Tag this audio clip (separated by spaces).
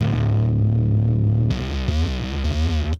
grimey dist hiphop lofi guitar basslines